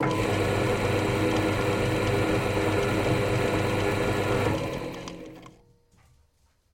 Drillpress running free no load meduim 4
A drill press turned on, not drilling anything. Start up run for a few seconds, and power down
drill, drillpress, electric, industrial, machine, machinery, motor, workshop